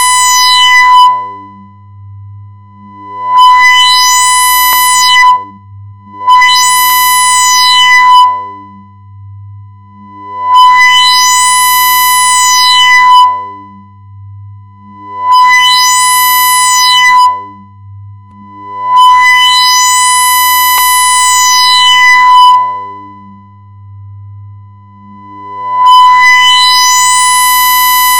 Sweep Tone
Sounds a bit like your radio...
Made using Audacity, the tone was given various effects to make it was it is now.
Somewhat not the best, but it's something I created in 5 minutes. So it shows what little, or much you can do... You decide.